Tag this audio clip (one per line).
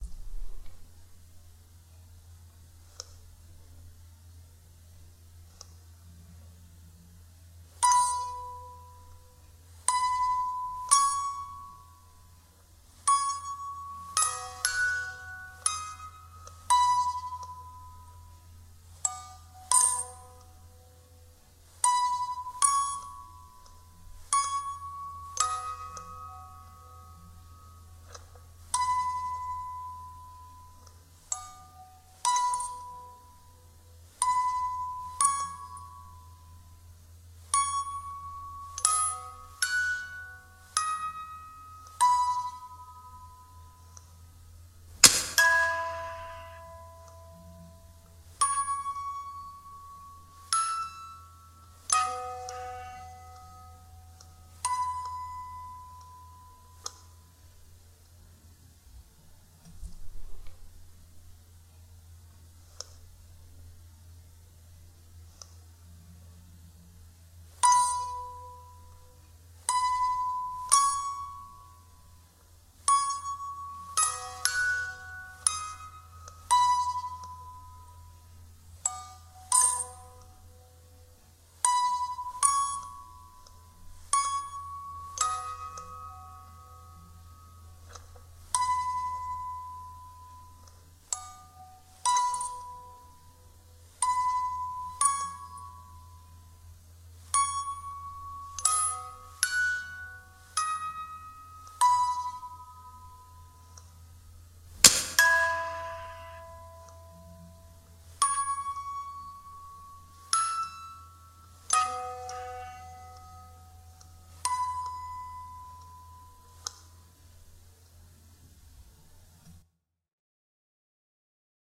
Music-box
Scary
Suspense
Loop
Creepy
Sound
Horror